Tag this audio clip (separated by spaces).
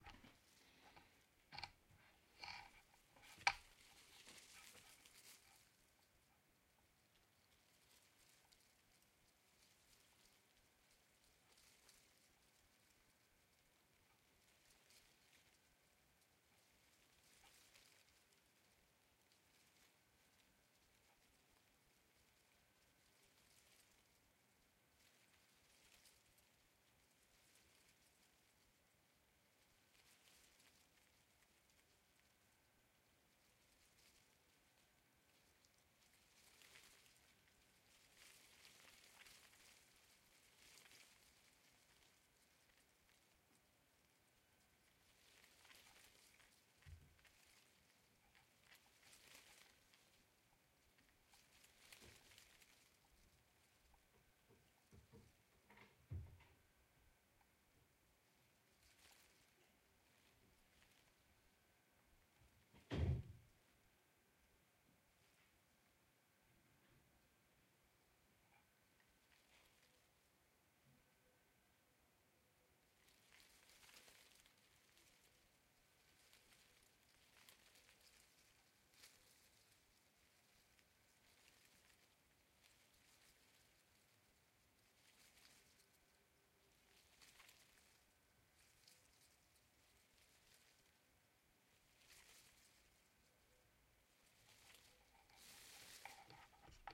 leaves branch tree